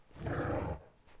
Quick snarl from the family dog as we play tug of war with her favorite toy. She has a very sinister, guttural growl that is betrayed by her playful intentions. In the background, you can hear the metal leash rattling on her neck.